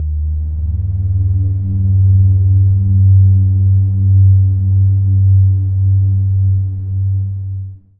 SteamPipe 5 SteamPad G#1
This sample is part of the "SteamPipe Multisample 5 SteamPad" sample
pack. It is a multisample to import into your favourite samples. A
beautiful ambient pad sound, suitable for ambient music. In the sample
pack there are 16 samples evenly spread across 5 octaves (C1 till C6).
The note in the sample name (C, E or G#) does indicate the pitch of the
sound. The sound was created with the SteamPipe V3 ensemble from the
user library of Reaktor. After that normalising and fades were applied within Cubase SX & Wavelab.